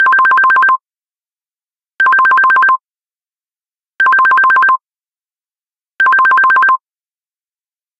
Alarm Clock
Small little sound if someone needs to emulate clock/phone. :) Enjoy!
ringing, cellphone, phone, alarm-clock